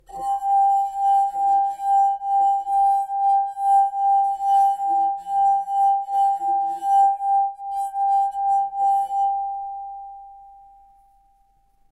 Ringing sound from crystal glass. Tune: G
Crystal wineglass filled a bit with water. Moving the finger around the top for making the special noice. Use a ZOOM H1 for recording.
sampler, abstract, fieldrecording